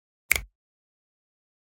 10.24.16: A natural-sounding stereo composition a snap with two hands. Part of my 'snaps' pack.